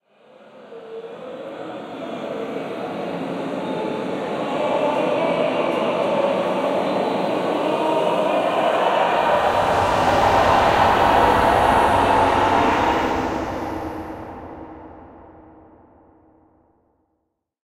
2001 voices(edit)
Same as 2001 voices reverb, but shorter.
and
sci-fi, human, soundeffect, chorus, effect